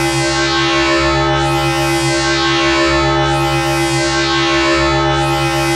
Sound effect made with VOPM. Suggested use - Ambient forcefield loop
FM-synthesis, video-game